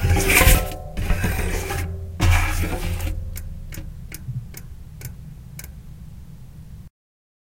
Fingers on Tire Spokes, stopping tire
Fingers touching spokes of a spinning tire until the tire stops spinning
bicycle, bike, fingers, spinning, spinning-tire, spokes, tire